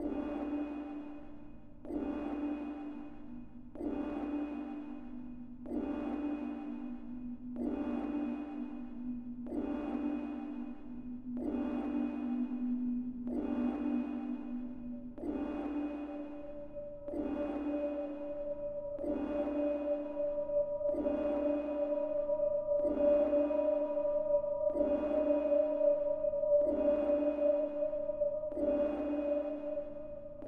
126 Tense Mysterium
A loop that works very well in the background and adds tension
processed, noisy, loop, 126-bpm, abstract, background